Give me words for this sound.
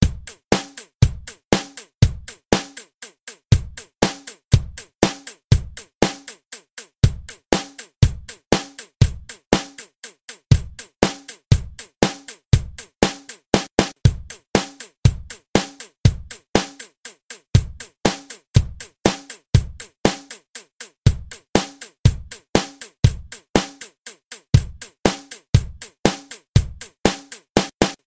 7-4 beat a ext
A drum pattern in 7/4 time. Decided to make an entire pack up. Any more patterns I do after these will go into a separate drum patterns pack.
7, 7-8, 8, drum, full, kit, pattern